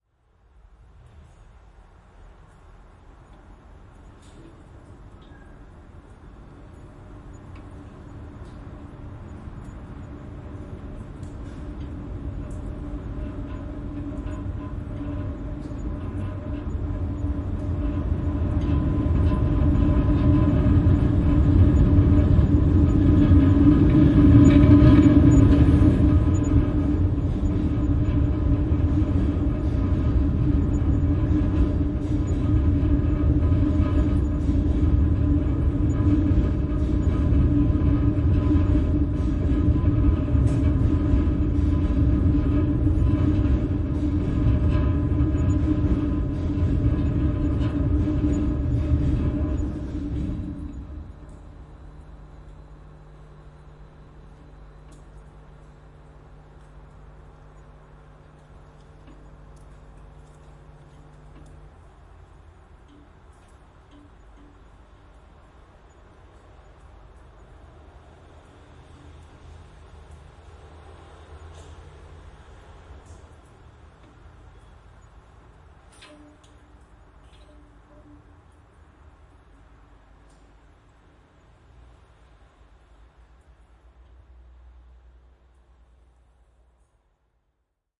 Railway shortEdit
Stereo file of Newcastle's Railway Bridge. The left channel is a contact-mic attached to the bridge itself. The right channel is an ambient mic. Internal and external sounds. The 'ground hum' that appears after the train passes isn't an equipment failure. These hums appeared regularly each time before and after a train passed over.
Recorded with ZOOM F8, DPA 4060 and JrF ContactMic
bridge, railway, contact-mic, uk, train, ambience, noise, city, field-recording